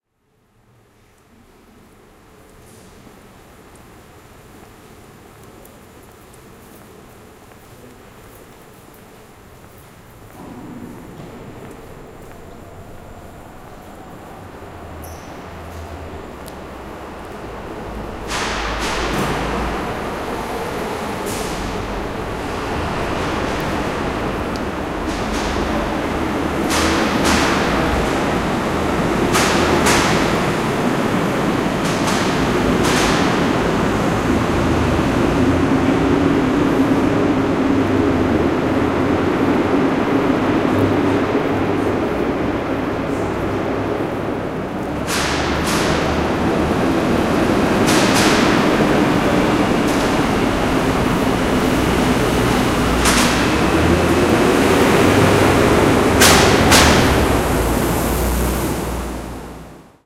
USJ Architecture Field Recording - Group 2 (2016)
Field Recording for the “Design for the Luminous and Sonic Environment” class at the University of Saint Joseph - Macao SAR, China.
The Students conducting the recording session were: Eugenio Fiumi and Sara Faria Rodesky
Soundscape, University-of-Saint-Joseph, Underground, Macau, Field-Recording